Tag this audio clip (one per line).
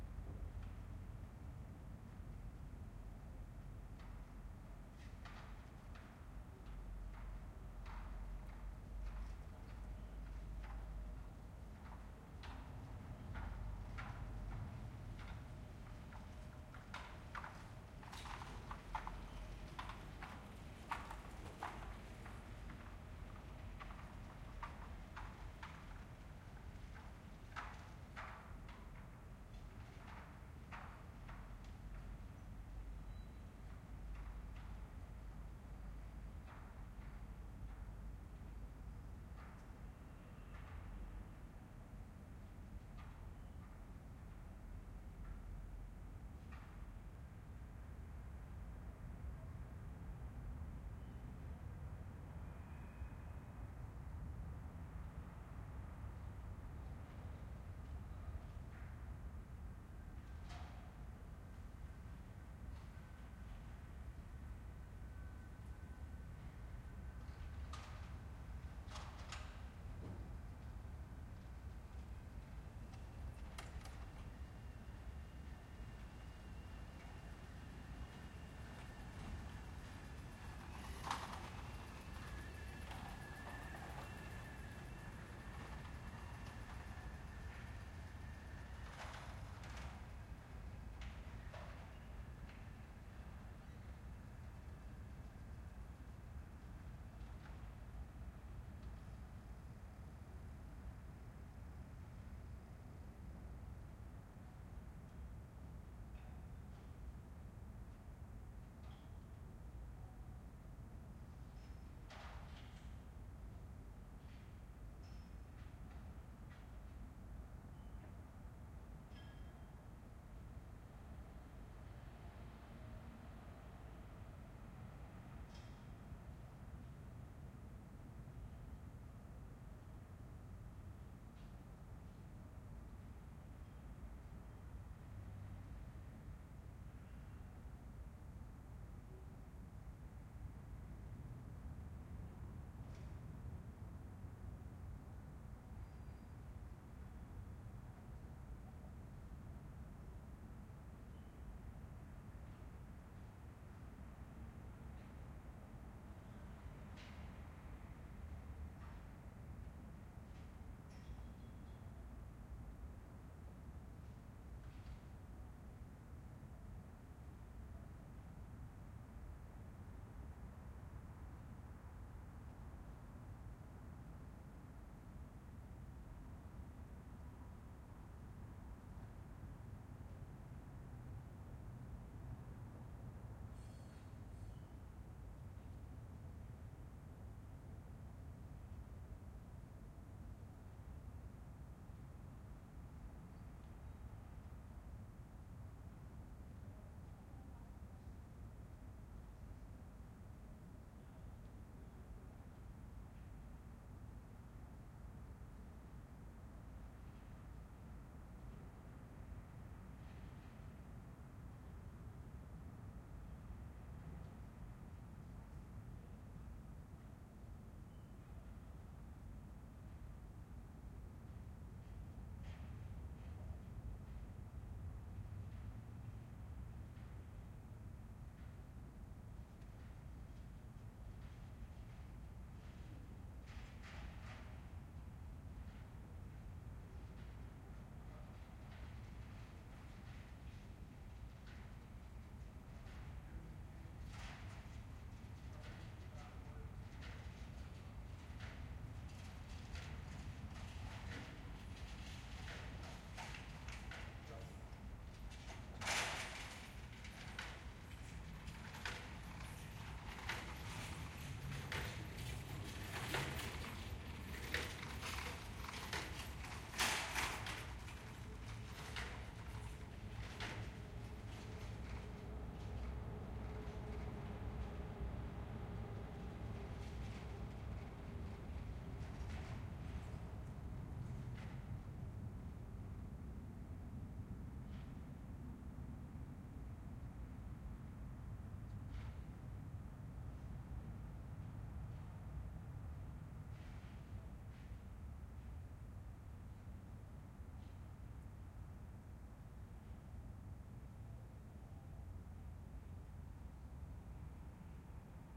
Amsterdam
bicycle
city
distant-train
Netherlands
night
pass-by
quiet
residential